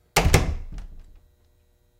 Wooden Door Close 4
Wooden Door Closing Slamming